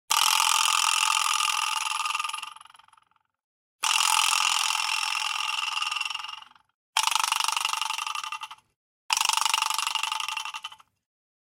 A couple of Vibraslap variations recorded with a AT2020 mic through a Audient iD4 interface. Enjoy!